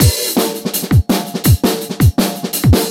Drums Breakbeat 2 Edited
A drum n bass / jungle / breakbeat drumloop I recorded here in my attic. Some electronic punchy kick added.
Recorded with Presonus Firebox & Samson C01.
groovy, bass, groove, amen, breaks, kick, hihat, jungle, drum, breakbeat, drumloop, dnb, loop, snare, rhythm, beat, break